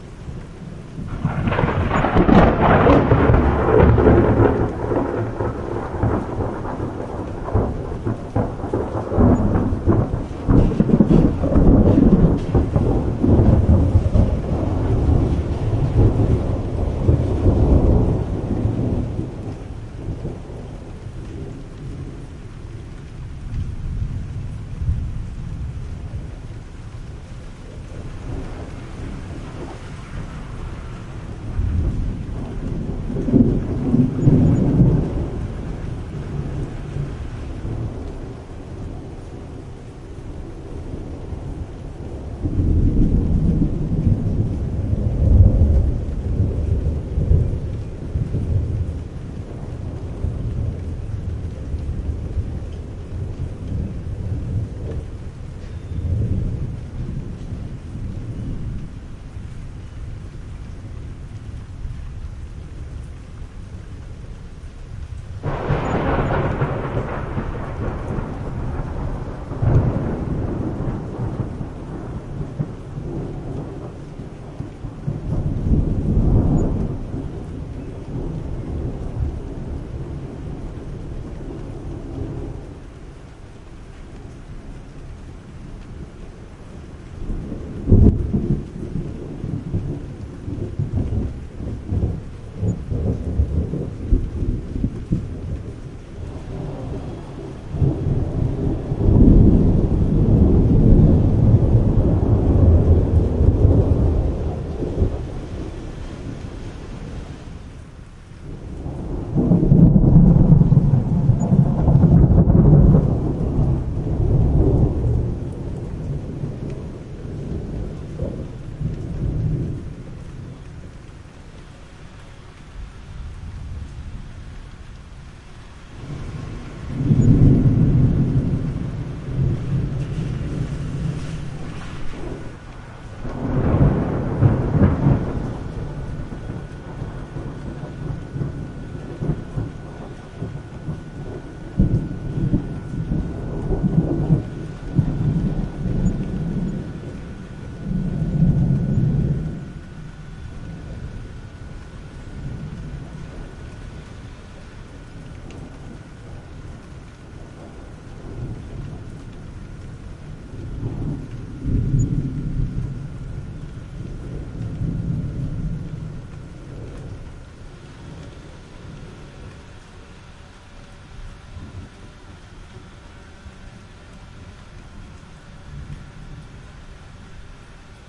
Thunder - near and distant rumblings